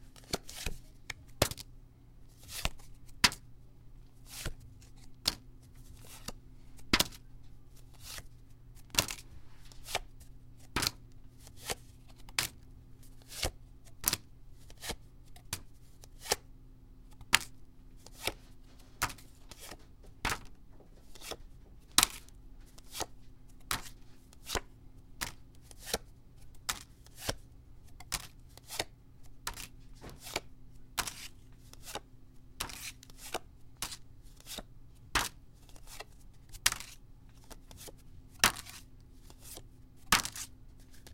throwing cards one by one into a piece of printer paper
cards, deck, playing-cards